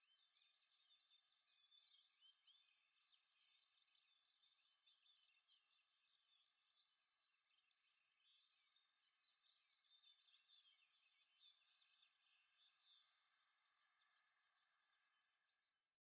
Clean Birds 1
edited file of birds sounds
birds, Clean, edited